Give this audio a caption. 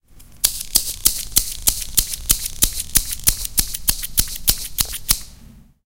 mySound TBB Gyuray
Sounds from objects that are beloved to the participant pupils at the Toverberg school, Ghent
The source of the sounds has to be guessed, enjoy.
belgium, cityrings, toverberg